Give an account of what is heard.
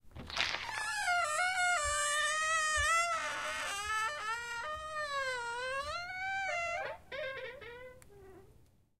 creak - front door 03
A door with creaky hinges being opened slowly.